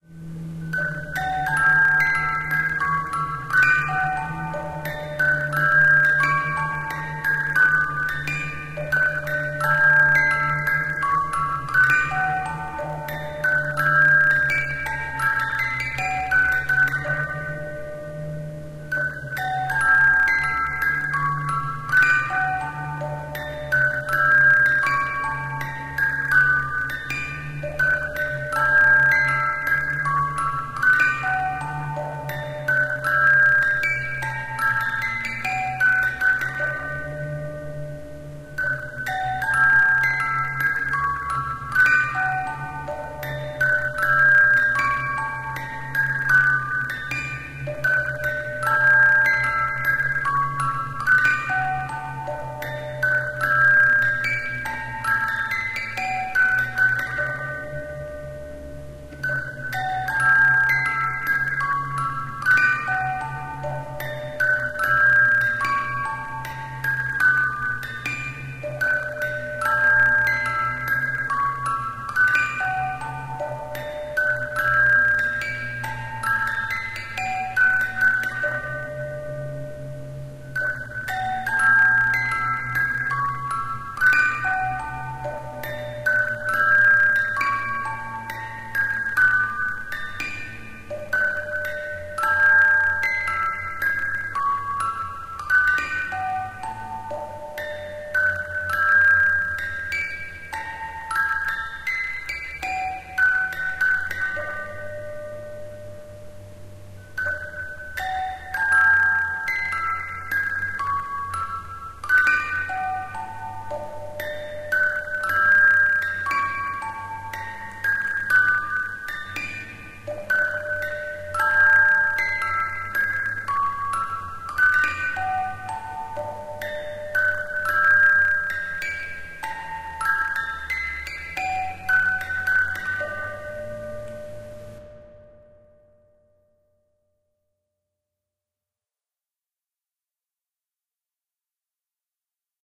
A simple old music box, probably from the 1950's.Recorded on a hp computer with a sony esm-ms907 microphone.Location: Iceland, Keflavik.
basic, childhood, melody